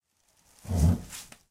Stand up from chair
stand, up, human, man, chair, armchair
stand, from, hair, man, up